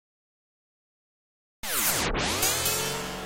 147 IDK melody 04
idk melody synth
idk, melody, synth